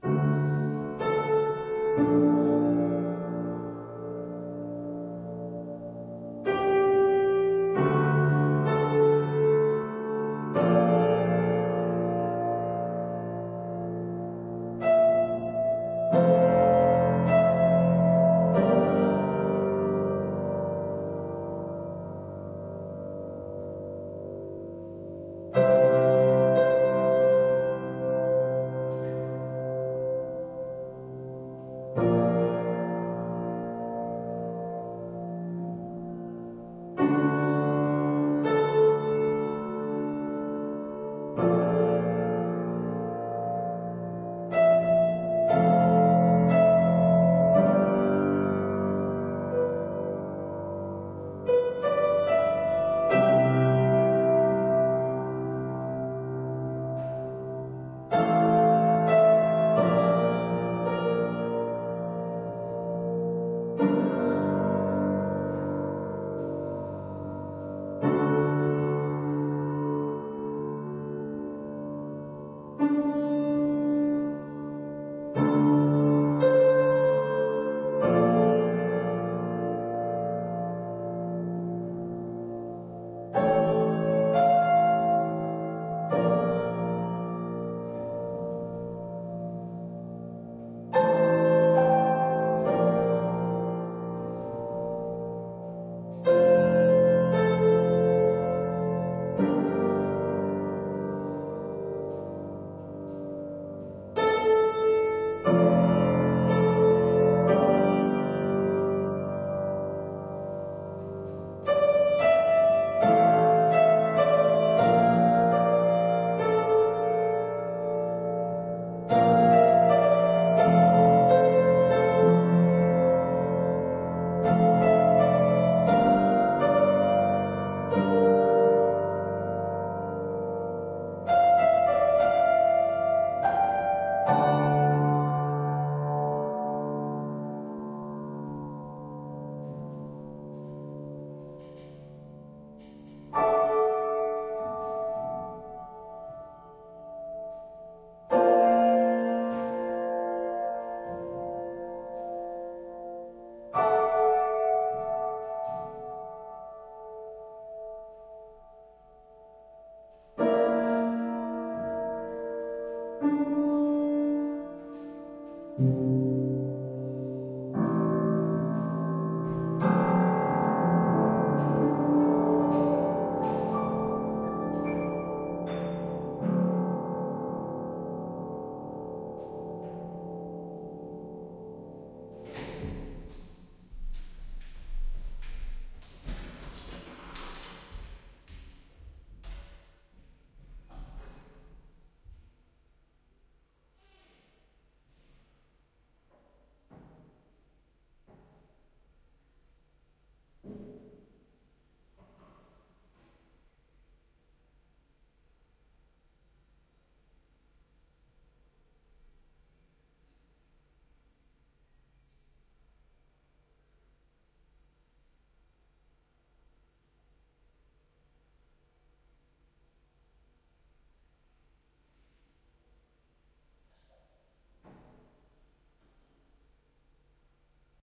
A piano improvisation